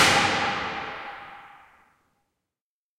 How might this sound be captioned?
Aigu loin short01
Stereo ORTF recorded with a pair of AKG C451B and a Zoom H4.
It was recorded hitting different metal stuffs in the abandoned Staub Factory in France.
This is part of a pack entirely cleaned and mastered.
drum, field-recording, hit, industrial, metal, metallic, percussion, percussive, staub